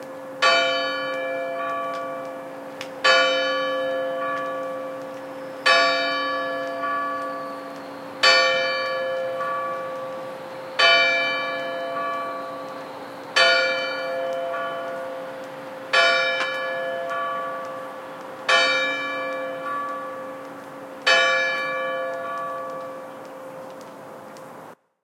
Church Clock Strikes 9
The church bell strikes 9 oclock
church-bell, 9, strike, chimes, church, clock